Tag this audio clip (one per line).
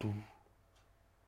beat
beatbox
dare-19
drums
dufh
human-beatbox
percussion